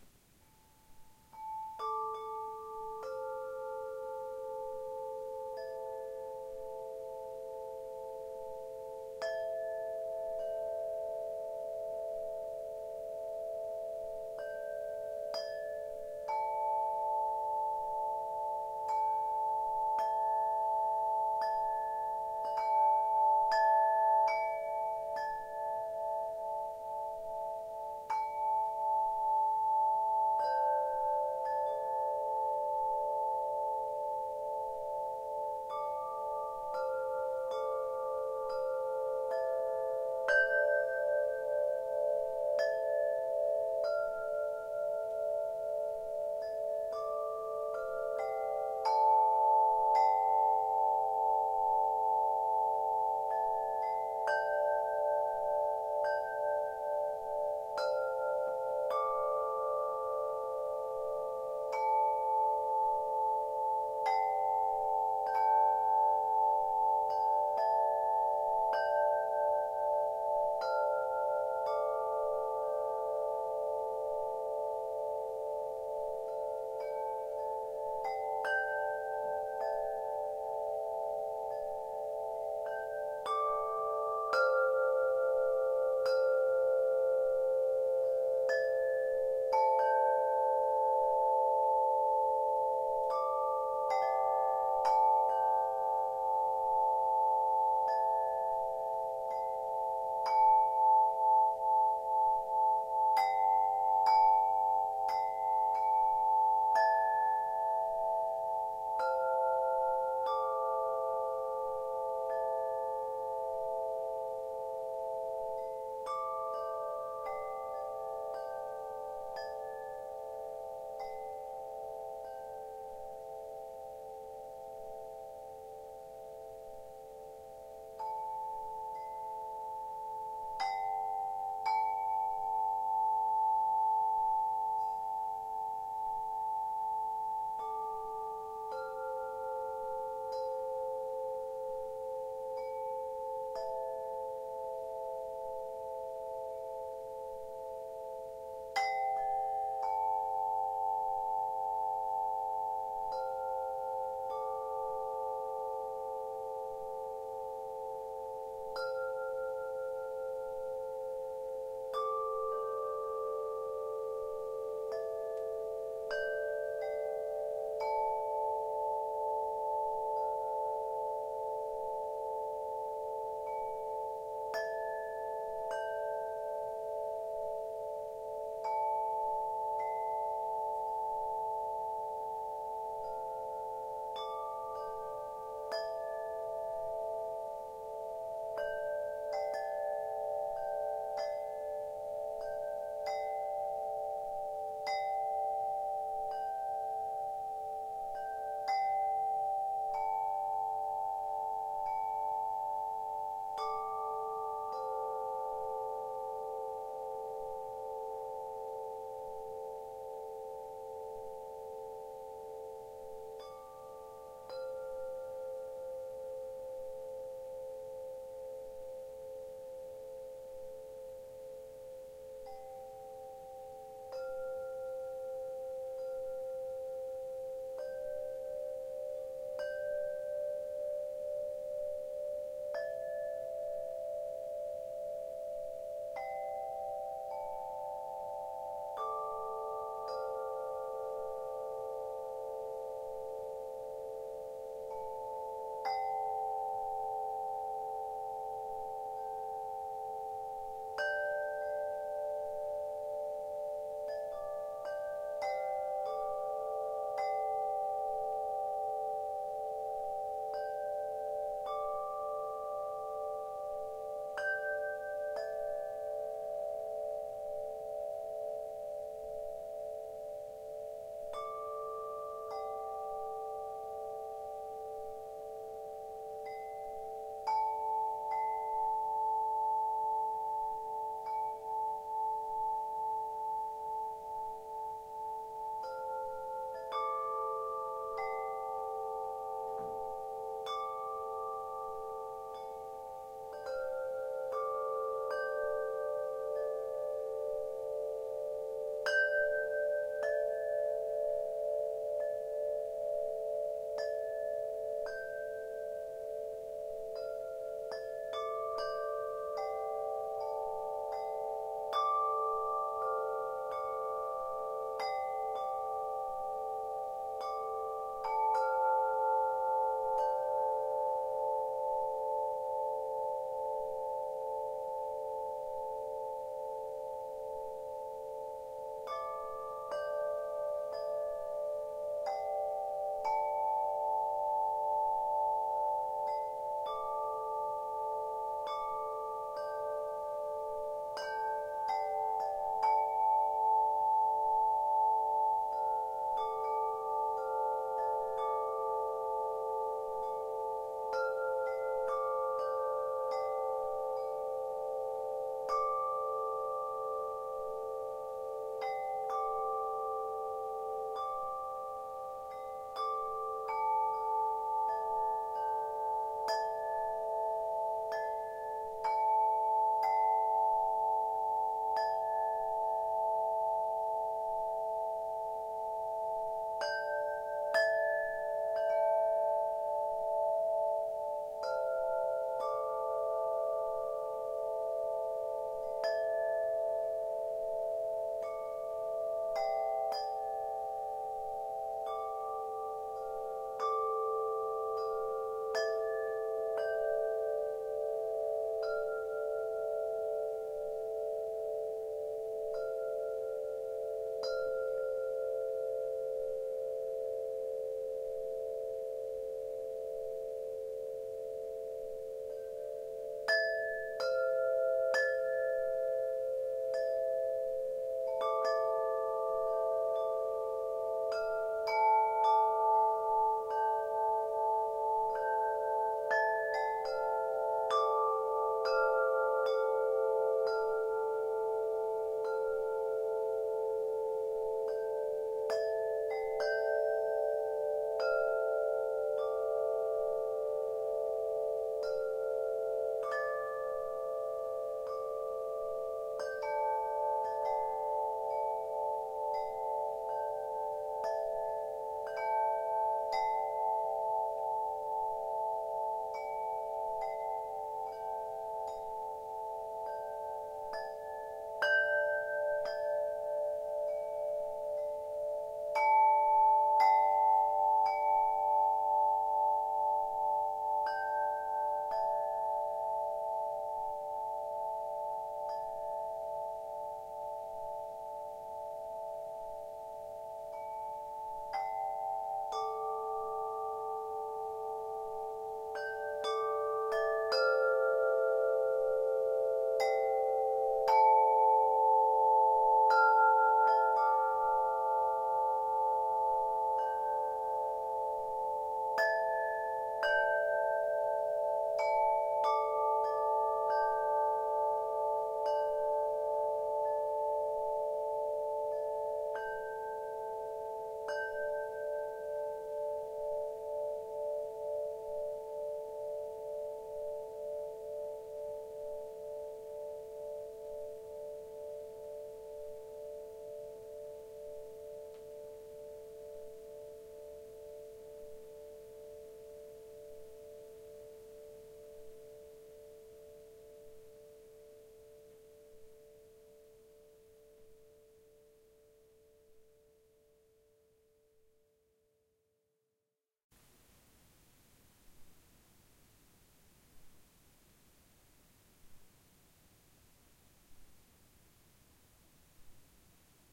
My first set of wind chimes - recorded with Zoom H4n. No processing, but the last 10 seconds is pure line noise in case you want to do noise reduction.
wind-chime, windchime, wind-chimes, windchimes